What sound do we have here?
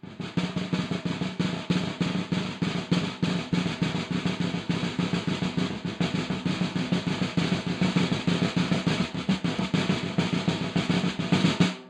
Snaresd, Snares, Mix (9)
Snare roll, completely unprocessed. Recorded with one dynamic mike over the snare, using 5A sticks.
drum-roll, snare